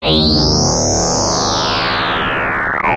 Thick low dropping filter sweep with ring modulation from a Clavia Nord Modular synth.
bleep, clavia, drop, filter, fx, low, modular, modulation, nord, ring, sweep, synth, thick